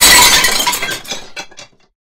Glass Smash 1 (loud, clipped)
Breaking glass bottles at the recycling plant using the XY mic on a good, non-windy summers day. The effect is really quite throwing the glass bottle hard enough so that when it hits otherwise broken glass, it will break and create an intense scattering sound of individual pieces which can be aesthetically pleasing to the ears.
Note: this is badly clipped which may "harshen" the sound, resulting in diminished dynamics and perceived quality. Future uploads are recorded more carefully.